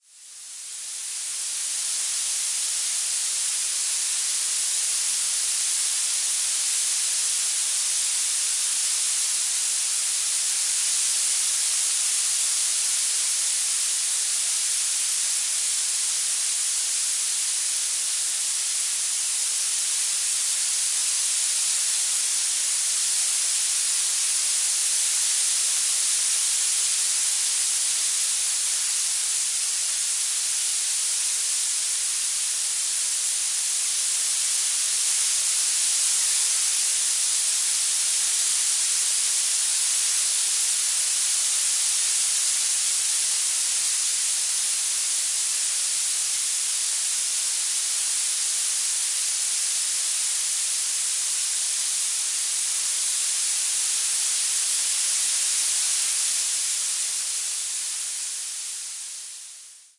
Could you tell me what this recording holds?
This sample is part of the "Space Drone 3" sample pack. 1minute of pure ambient space drone. Non evolving rain sticks.
ambient, soundscape, space